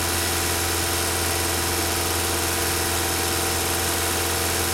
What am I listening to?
Loopable clip featuring a Mercedes-Benz 190E-16V at approximately 2500RPM at full engine load. Mic'd with a DPA 4062 taped to the radiator support just above the driver's side headlight.
engine, benz, dynamometer, dyno, car, mercedes, vehicle, vroom